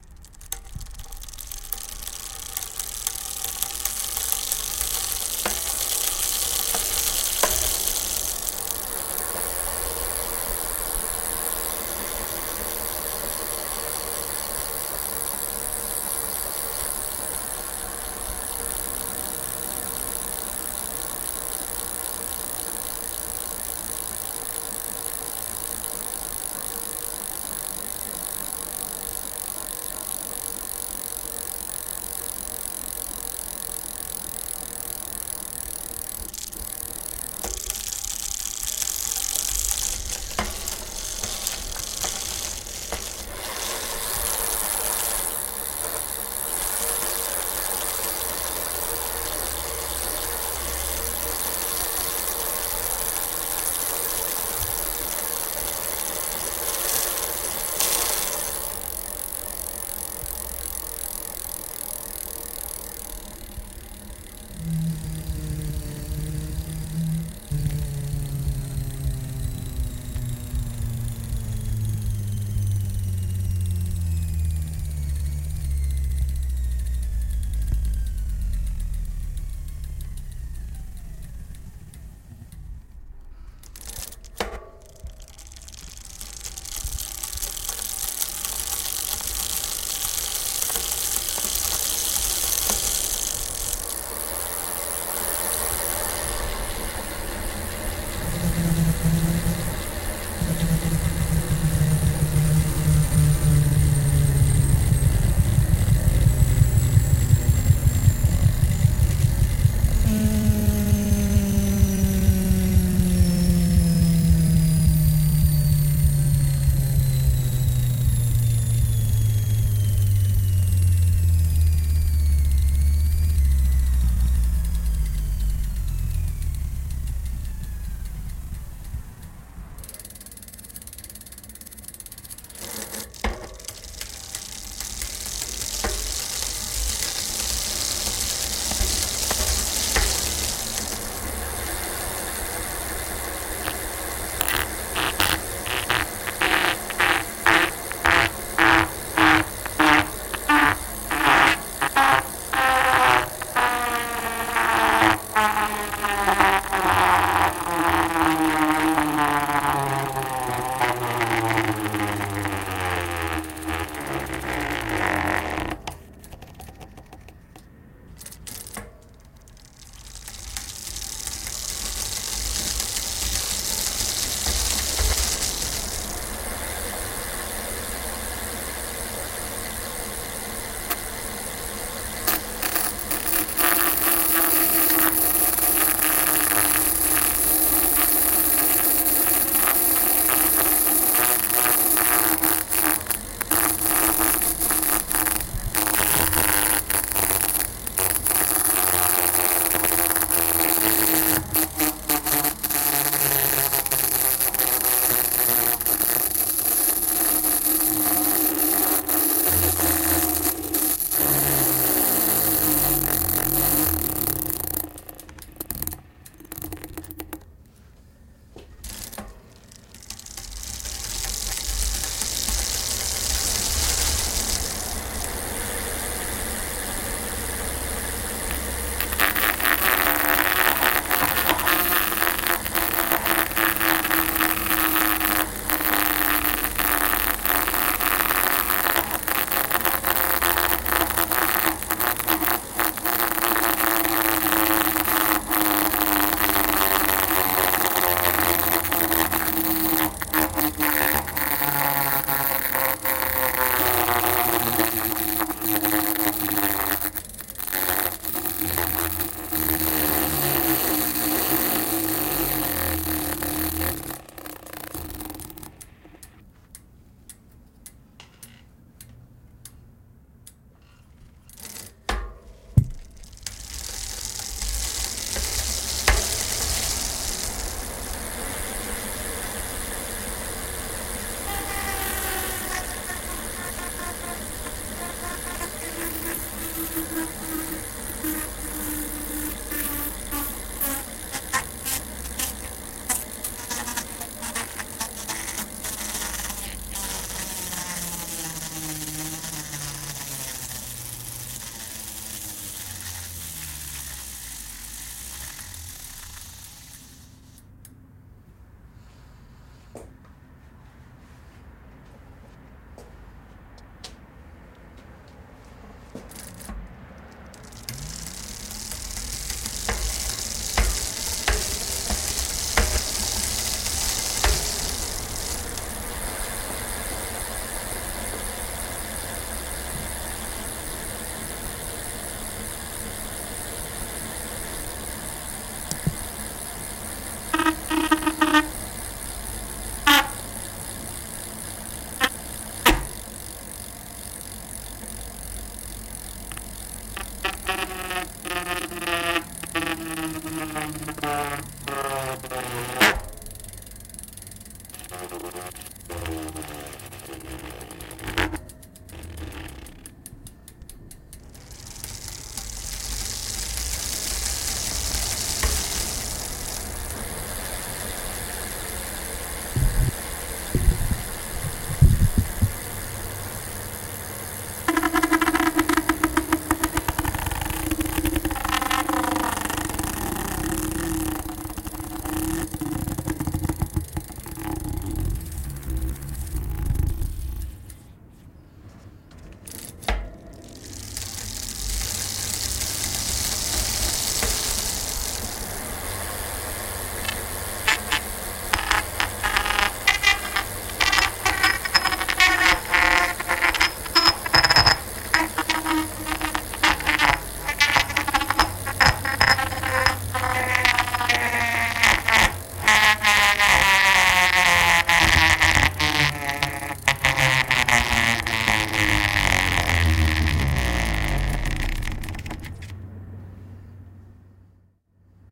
bike tire scrapped while spinning
This is the source recording for my first sound made for my "a sound design everyday" project.
Every day I will record a sound and then create designs, collages, and lil' music tidbits using the source recording and only the source recording.
For this sound, I flipped my bike upside down and used various objects to press against the spinning tires
Or explore this pack to hear a sampling of the sounds generated from this source.
bike, field-recording, gears, scrape, sound-design, speed, spinning, tire